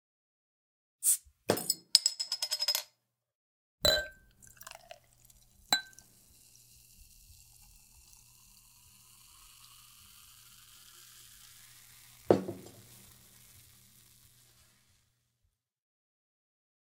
Bottle Open Pour Fizz
Opening a bottle and pouring into a glass. Recorded with Sennheiser 416 on Tascam DR-680.